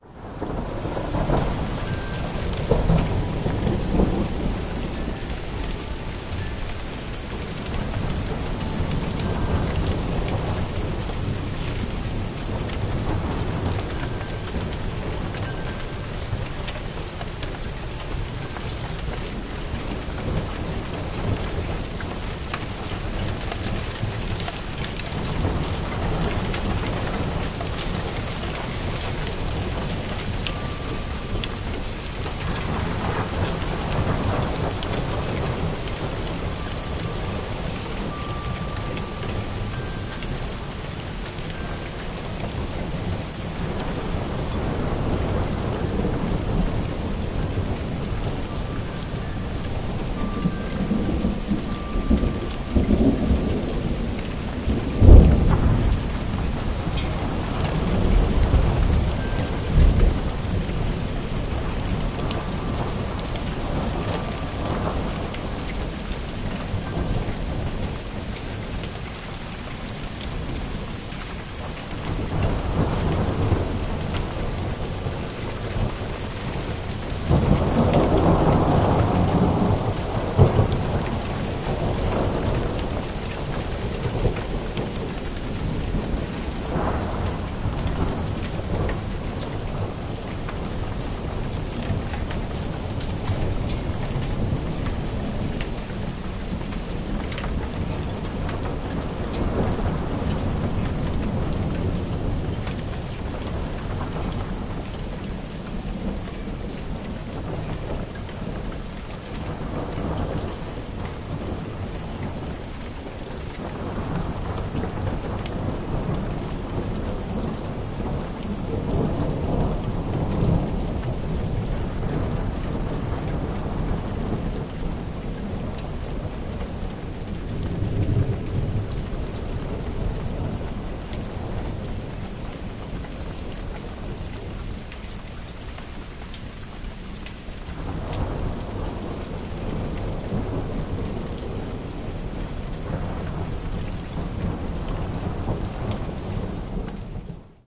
040216 Hail Storm With Constant Thunder Roll
Yes, I'm crazy to stick my head with Roland CS10M mics in my ears attached to an Olympus LS14 through my back porch door during a hail storm, but what I heard could not be missed. The constant, almost non-stop rull of thunder lends an erie quality to this midnight storm in Madison, NJ. Got popped on the nose with a couple little stones, but it was worth it.